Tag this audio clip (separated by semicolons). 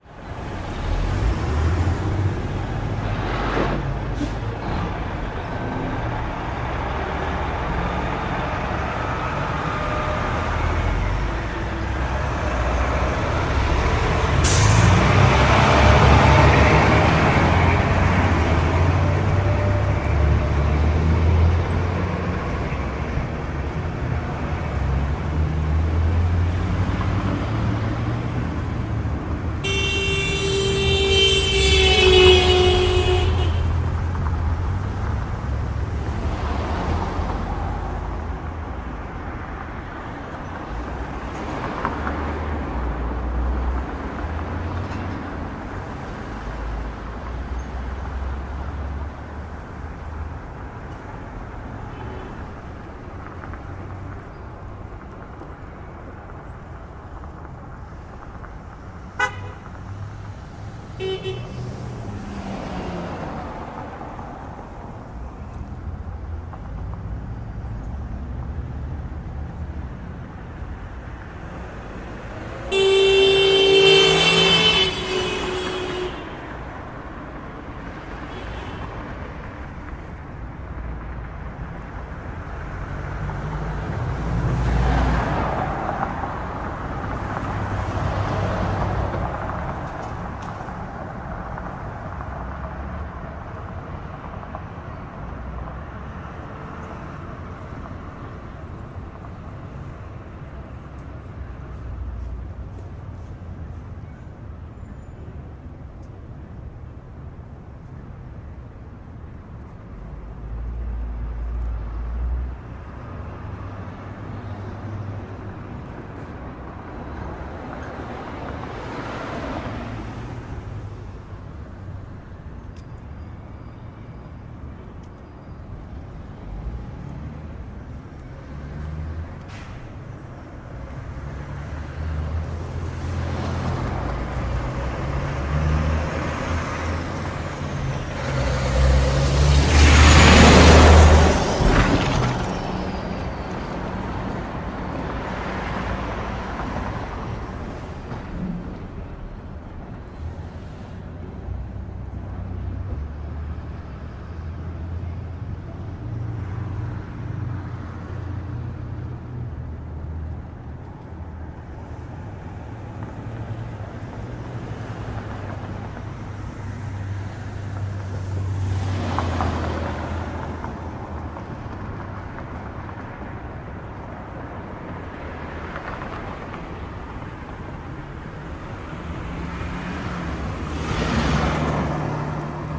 Street; City; Country; Traffic; Highway